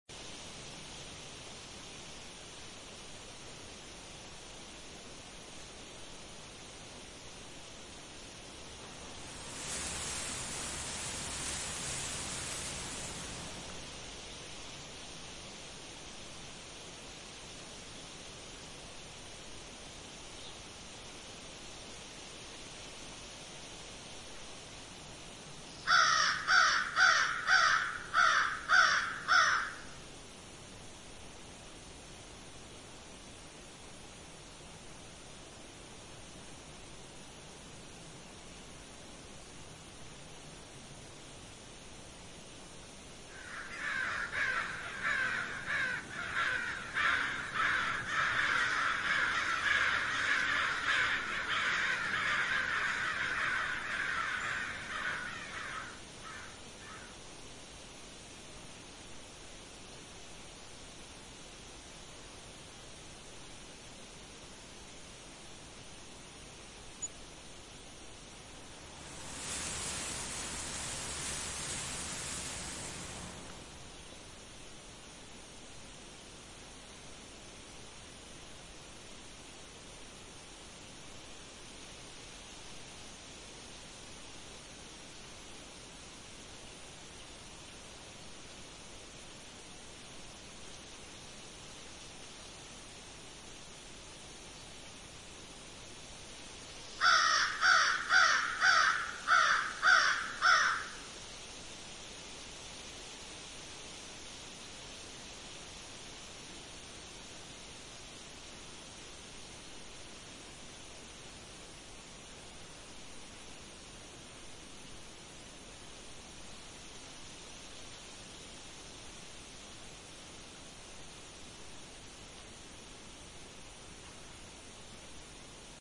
An empty and calm graveyard. The wind is blowing, and there are a few crows around.